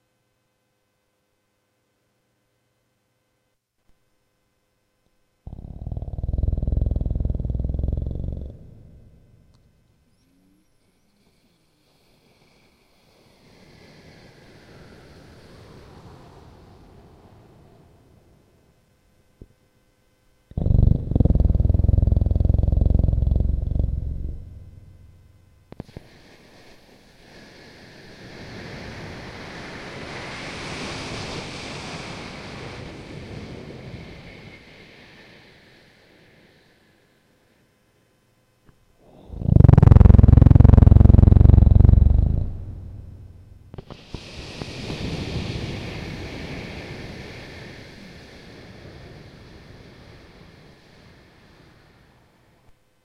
Sleeping giant
A giant sleeps in his cave in the Midgard mountains. He snores so violently, that the whole mountain shakes. People thought it was an earthquake.